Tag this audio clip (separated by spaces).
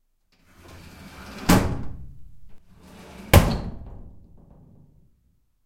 closing door metal shut slam slamming